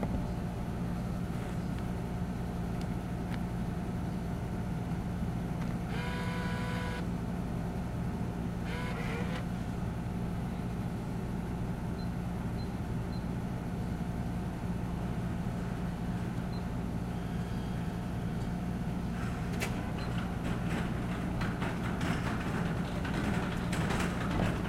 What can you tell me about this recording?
Vending Machine Money Button Vend approaching cart
getting food from a vending machine. A cart approaches at the end
machine, sequence, vending